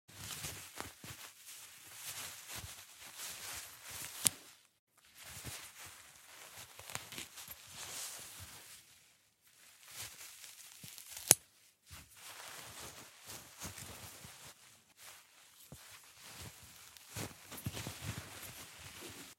Cloth - rustle - heavy - snap - clothespin
Cloth movements with occasionnal clothespin (hanging laundry outside)